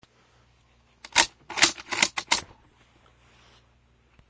Mosin Nagant Bolt (fast)
This is the sound of an old bolt action Russian Rifle being cocked at a fast pace. Remember to be responsible and don't frighten anyone with this noise.